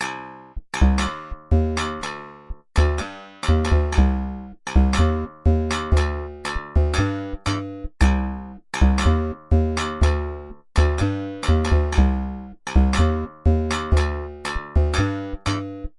Cartoon pop (Cminor-120bpm)
120bpm, cartoon, loop, loopable, pop